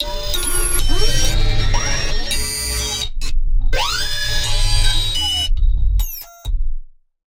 Robotic Repair
An attempt at creating an android robot being repaired. Although, it does sound like it could be an angry printer just as well..
The basic method is fairly minimal. Just a saw and square wave being ring modulated in real-time.
alien, android, artificial, computer, film, futuristic, games, machine, mechanics, repair, robotic, Sci-fi, technology